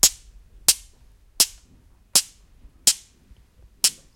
essen mysounds simon
metall loadstones clinging to each other
Essen, mysound, germany, object